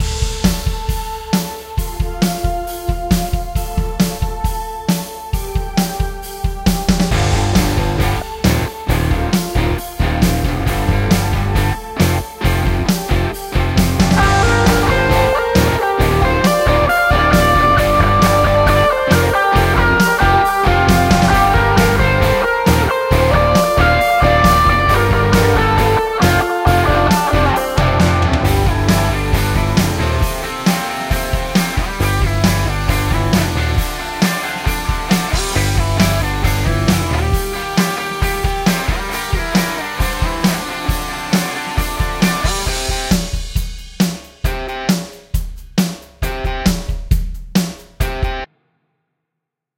Rock song short10
Rock song short 10
Wrote/Recorded a short rock song at 120 bpm in Ableton live 9:
Guitar recorded direct into a Scarlett 18i20 then used Guitar Rig 5 plugin from Native Instruments.
Bass recorded direct into a LA610 preamp then into the Scarlett 18i20.
EZdrummer for drums.
Additional notes:
-12.1 LUFS integrated.
-1.9 dB True Peak Max.
hope this helps and is useful for your next project.
cheers,
120bpm, alternative, bass, drums, guitar, riff, riffs, rock, rockish, short, song